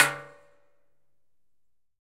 hit - metallic - trailer - metal fender 01
Hitting a trailer's metal fender with a wooden rod.
wooden, metallic, metal, fender, bang, clang, wood, hit, percussive, strike, trailer, impact